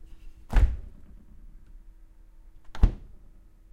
fridge.door
the noise of my fridge being opened and closed. RodeNT4>Felmicbooster>iRiver-H120(Rockbox)/ puerta de rfrigerador que se abre y se cierra